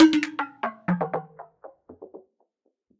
Pringle 7 - Knick Knack
hitting a Pringles Can + FX
loop, metallic, percussion, percussion-loop, rhythm